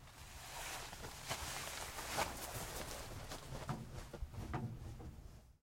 body moving through bushes
bushes, forest, garden, hidding, leaves, sneaking